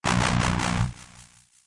system grind 01 sizzle
Alvarez electric through DOD Death Metal pedal mixed to robotic grinding in Fruity Loops and produced in Audition. Was intended for an industrial song that was scrapped. Approximately 139.5bpm. lol
electric, grind, industrial